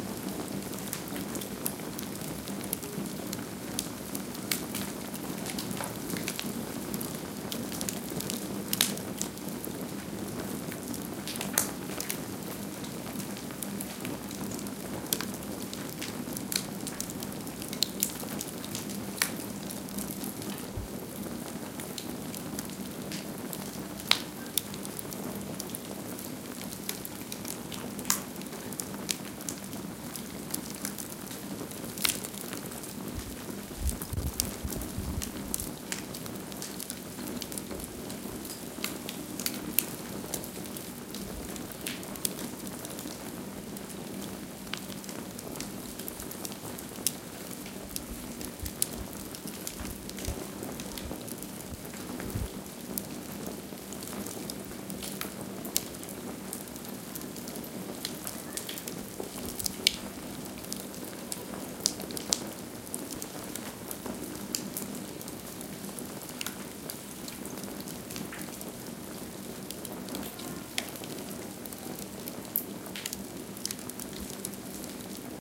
Wood burning on a open fireplace. Recorded inside a large wooden goahti at Ylläskaltio hotel in Äkäslompolo, Finland.
burn, burning, combustion, crackle, crackling, field-recording, finland, fire, fireplace, flame, flames, lapland